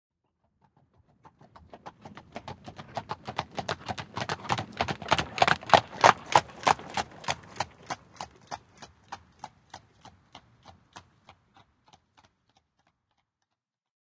Horsewagon from 18th century